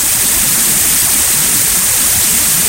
This is the main loop when the firing the laser on any ship. The constant stream of death and destruction is just going on and going on. This sound can be used for firing off just a small laser shot as well.